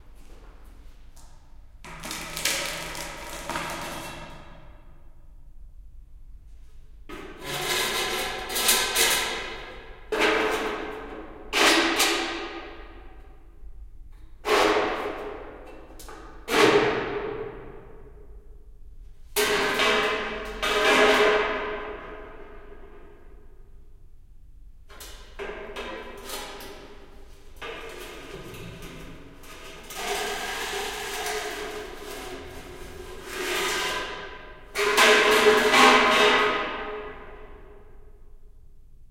A plastic object hits a metal object and they fall on a stone floor in an empty basement. Recorded in stereo with RODE NT4 + ZOOM H4.